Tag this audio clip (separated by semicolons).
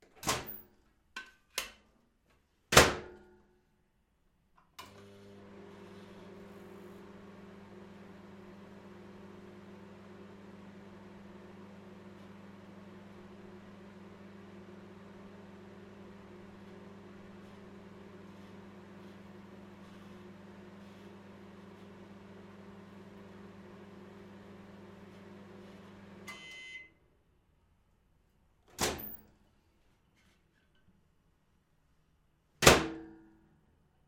kitchen; microwave; household